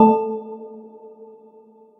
windows-like physical modeled alert sound original 2 [Oneshot] {Render as Loop}
UI sound effect. On an ongoing basis more will be added here
And I'll batch upload here every so often.
2, alert, modeled, original, physical, sound, windows-like